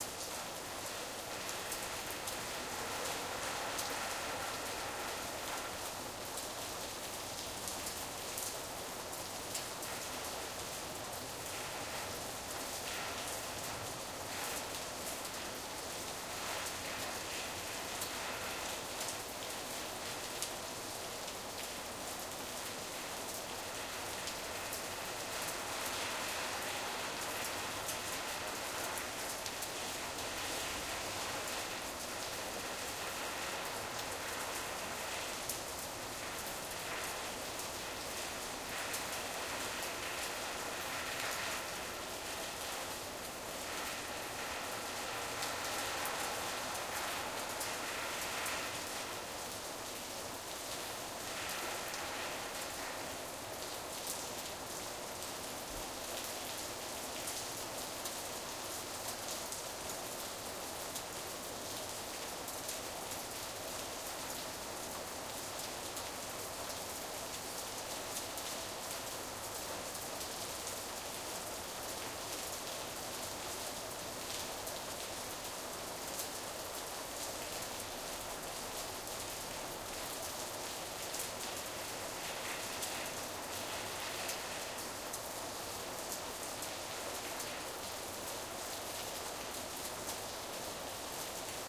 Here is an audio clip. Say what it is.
Medium Rain Ambience Tin Roof Top edlarez vsnr
Medium Rain Ambience hitting a Tin Roof top edlarez vsnr
rain, medium, ambience, soft, raining